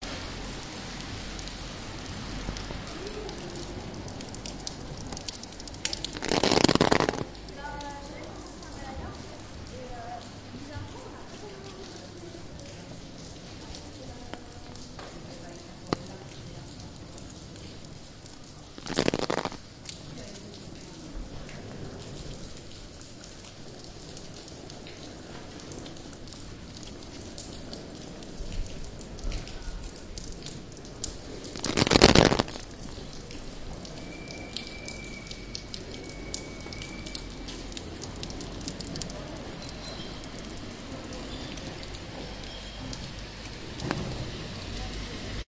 Lluvia sobre un paraguas andando por la acera de calle del Carmen con la fachada de la biblioteca nacional. Los desagües a bastante altura golpean alternativamente el paraguas.
Rain on an umbrella walking along the sidewalk of Carrer del Carmen with the facade of the national library. The drainages at quite a height hit the umbrella alternately.